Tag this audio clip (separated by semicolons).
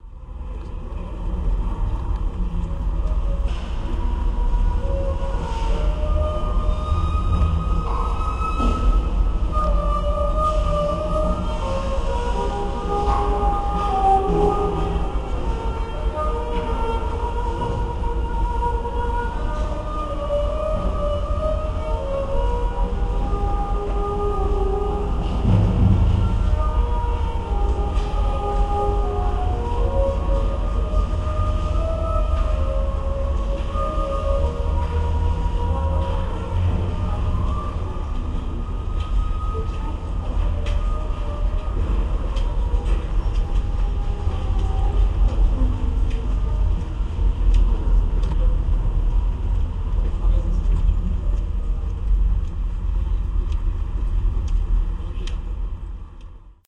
ambient; field-recording; street-singer; street; movie-sounds